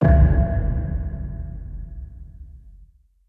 synthesizer percussion 13
synthesizer processed sample